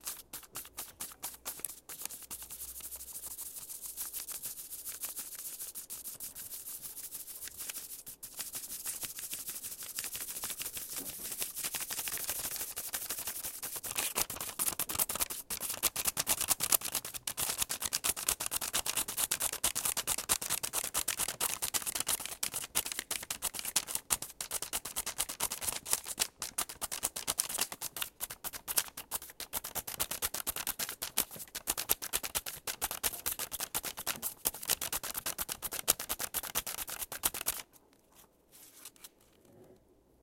mySound Piramide Pol
Sound from objects that are beloved to the participant pupils at the Piramide school, Ghent. The source of the sounds has to be guessed.
waving-papers, mySound-Pol, BE-Piramide